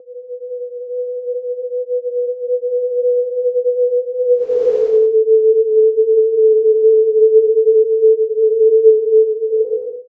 RAMASSAMY ASHOK alarmingly atmosphere
It's a alarmingly atmosphere. It's Normalized, with fade in, fade out, paulStretch effect.
sci-fi, ambience, alarmingly